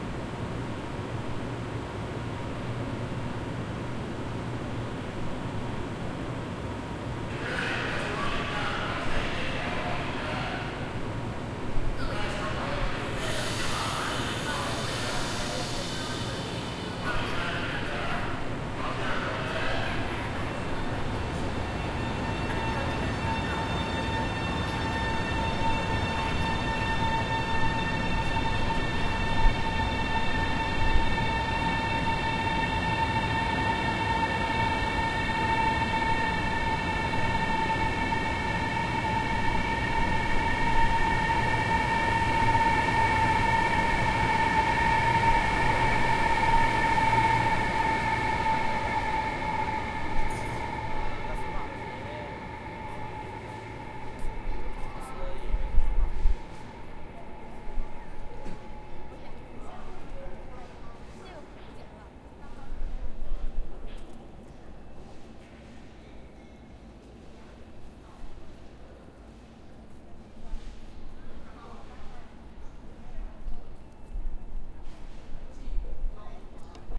Bullet-train leaving Cangzhou station late at night on it's way to Beijing.